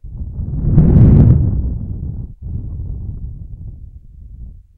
Quite realistic thunder sounds. I've recorded them by blowing into the microphone
Lightning, Loud, Storm, Thunder, Thunderstorm, Weather